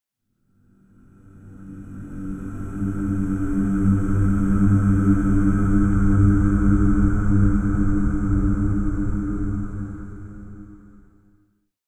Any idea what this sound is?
Evil Choir
Pad sound, with a deep bassy vocal tone and slight reverberation to add to the spooky effect.
dirge, ambient, vocal, bass, pad, soundscape, choir, dark